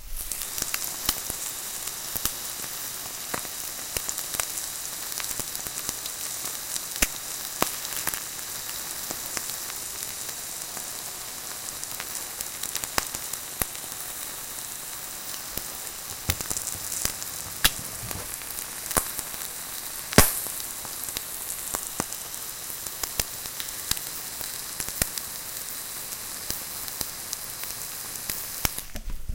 eggs frying 2017
frying eggs in frying pan